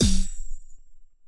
generated a series of percussive hits with xoxo's free physical modeling vst's
and layered them in audacity